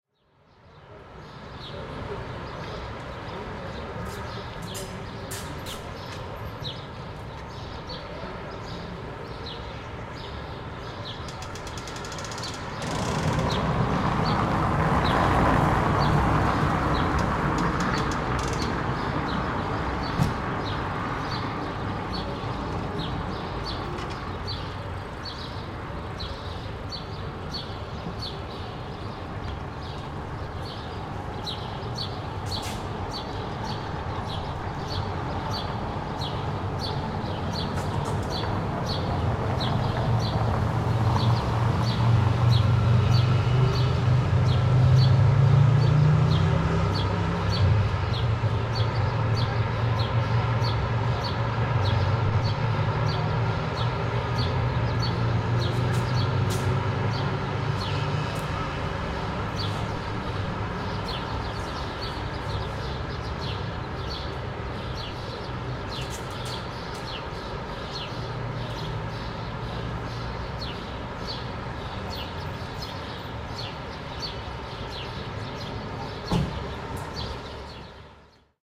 Berlin window atmo
Recorded from my open window with a NT1, location is Kreuzberg, Kottbusserbrücke.
There is a lot of birds, cars passing in the street, normal traffic.
atmo, berlin, birds, cars, outside, traffic